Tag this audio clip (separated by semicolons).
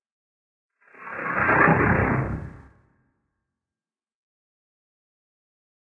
alien; arrival; artificial; bionic; creature; effect; horror; intelligence; intelligent; machine; mechanical; monster; organic; robot; scifi; sound; space; spaceship; speech; transformers; vocal; vocalization; voice